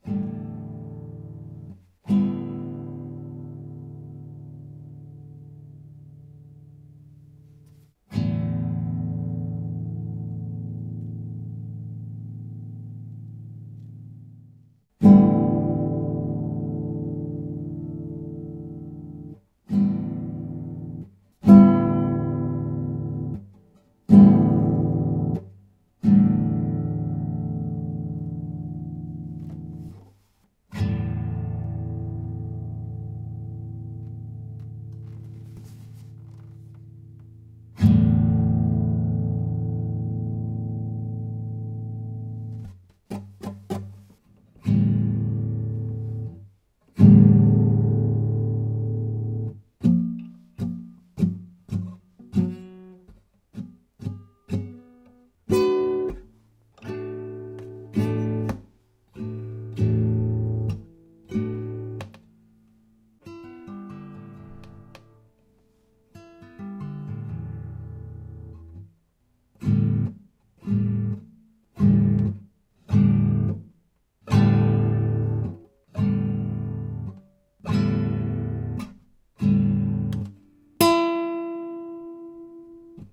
Acoustic guitar thrum
Making noises on the guitar.
jingle, thrum